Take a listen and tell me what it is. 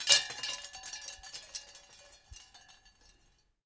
wind chimes 03
This is recorded from wind chimes, it`s a almost 2 meter long string with small, different sized, plastic like bowls on it. I recorded it hung up on the wall, because i needed it to sound more percussive.